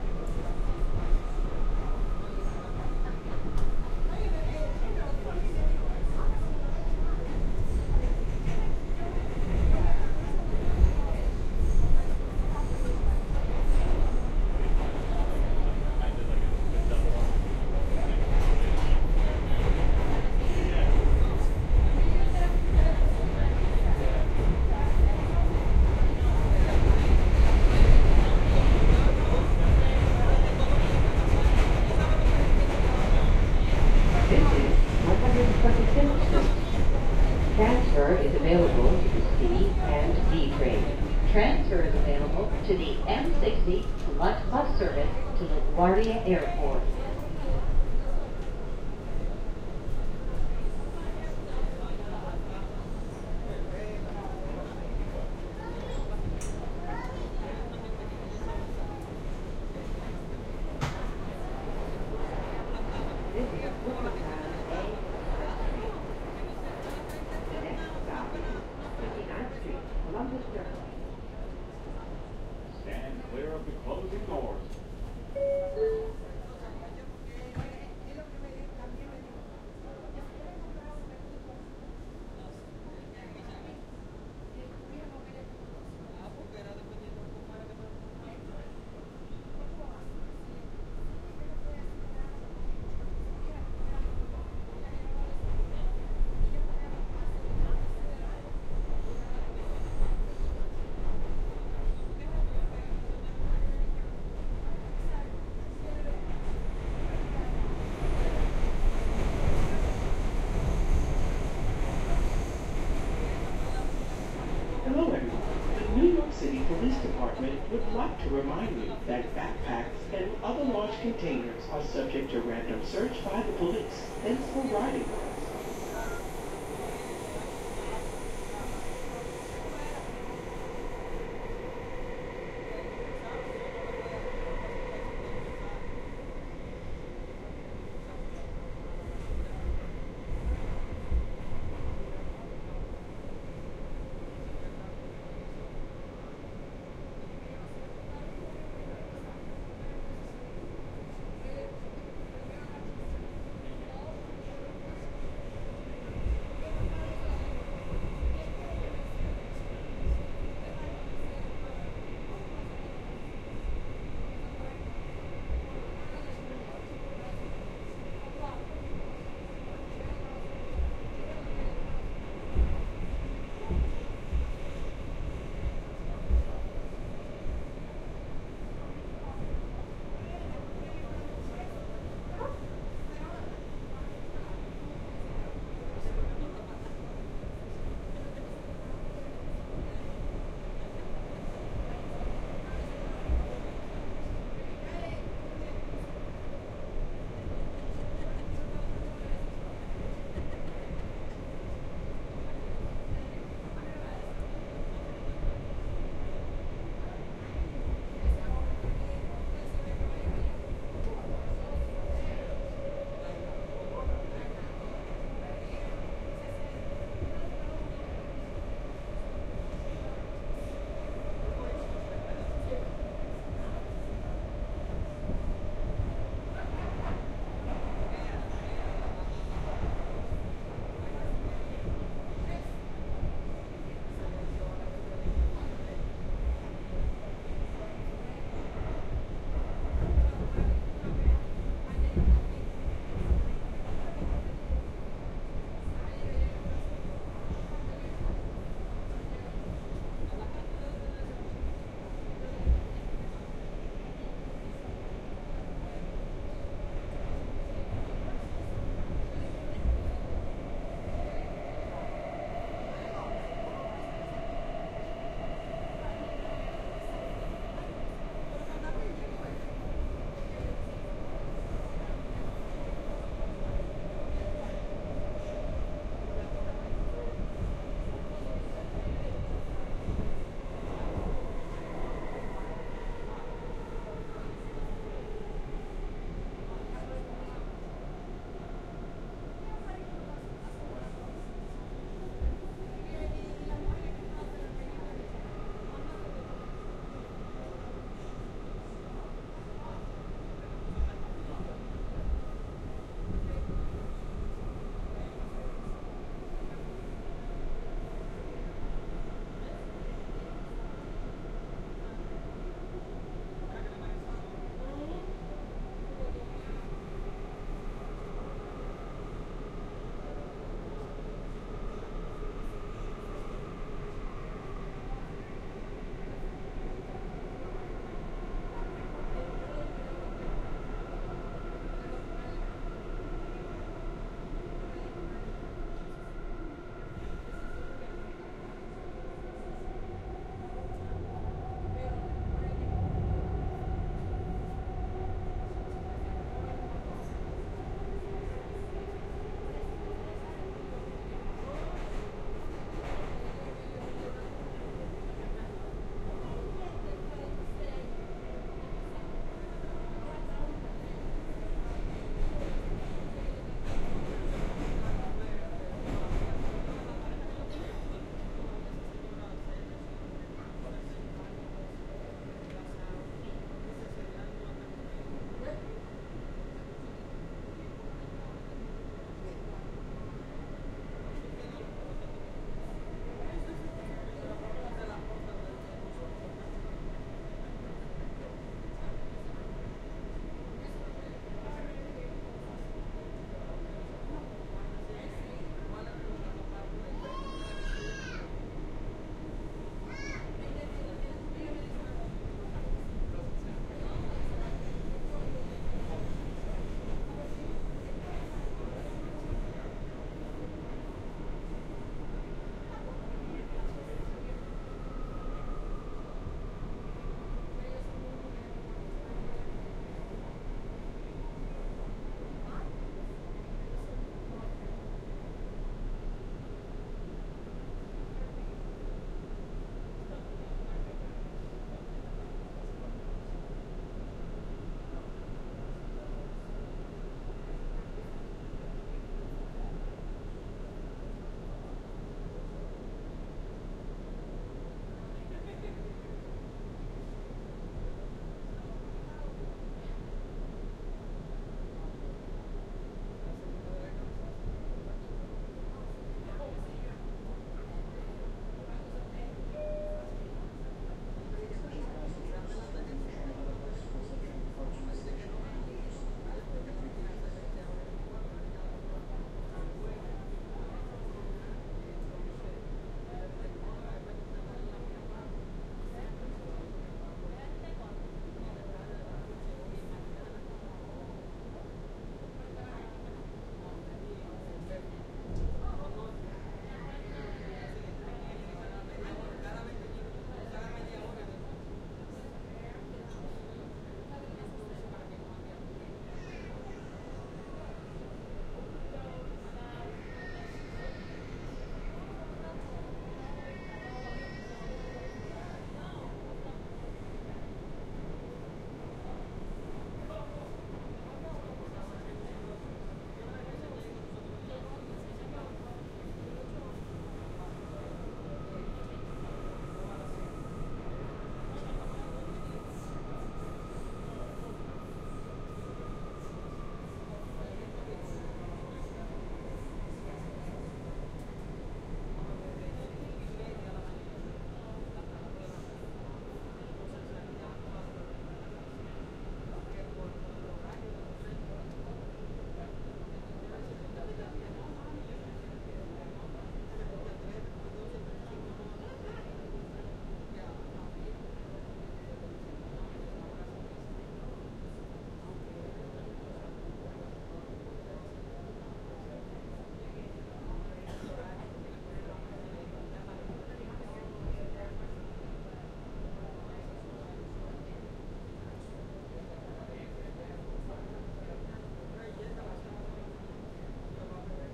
NYC Commute — Harlem to 7th Ave Station (Part 2 of 6)
Credit Title: Sound Effects Recordist
Microphone: DPA 5100
Recorder: Zaxcom DEVA V
Channel Configuration (Film): L, C, R, Ls, Rs, LFE
Notable Event Timecodes
PART 1: 01:00:00:00
01:00:00:00 — Header & Description
01:00:35:00 — Clear / 149th between Broadway & Amsterdam
01:01:10:00 — 149th and Amsterdam
01:02:56:00 — 149th and Convent Ave (Block Party)
01:03:35:00 — Convent Ave between 149th and 148th
01:04:15:00 — Convent Ave and 148th
01:05:25:00 — 148th and St Nicholas Pl (***features uncleared music in vehicle passby***)
01:05:52:00 — Entering 145th St Station Downtown
01:06:18:00 — Turnstile Entrance
01:06:29:00 — Running Down Stairs to downtown A Train
01:06:45:00 — Boarding Train
PART 2: 01:09:38:10
PART 3: 01:19:13:02
01:21:26:00 — Train Doors Open & Exit Train at 59th St / Columbus Circle